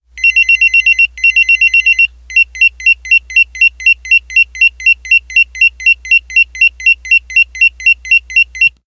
The beep tones my old pager makes when it is turned on. There are a couple 'boot up' beeps followed by the 'low battery' warning beeps. I needed some of this sound to use in a theatrical production [ A New Brain ]. I recorded it on my laptop computer using a cheep condenser mic and Audacity.
beeps, electronic, pager, beeper, beep